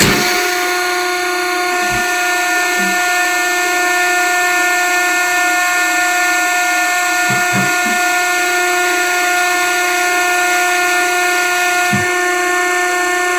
Dumpster Pressing 5
(CAUTION: Adjust volume before playing this sound!)
A short segment of the "Dumpster_Press_2" sound rendered as a separate clip for editing purposes.
Sci-Fi, Compressor, Dumpster, Science, Mechanical, Machinery